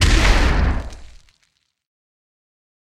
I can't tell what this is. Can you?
Impact 2 full
An impact explosion on a metal surface
bang, bomb, boom, detonate, explode, explosion, explosive, tnt